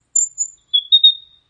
In order to make the environment feel more realistic, I wanted to include the sounds of birds as it is very possible that they could be in and around the temple, as well as hidden within the trees that make up the mountainous area surround the temple itself. I have included 3 different bird sounds that I feel can be played randomly and varied in pitch to create multiple sounds at once.
birds, singing, tweet